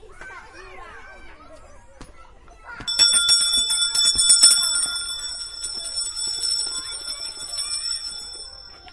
10:35 playground at ourSchool
TCR, playground